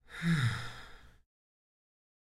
sad sigh sound